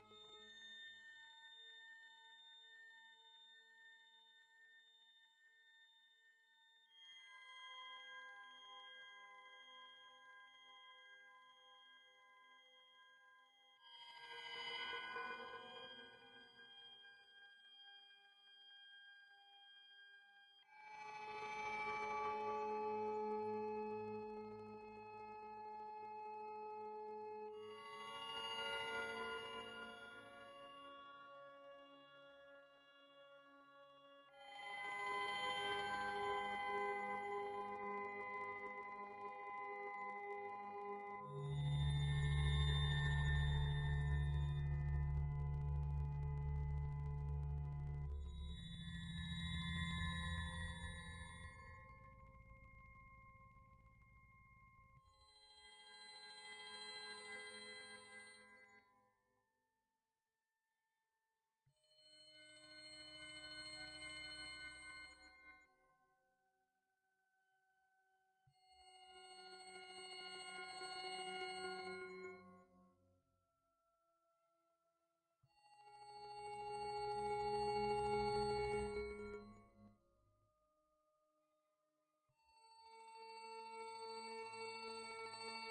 op-9 corpzeispad
Dark pads with some random fm effects.
ambient, pad, fm, effects, thriller, drone